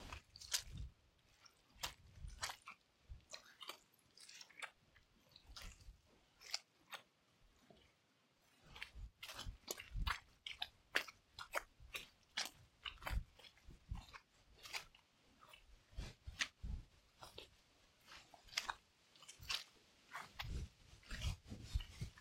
mushy slopping steps in mud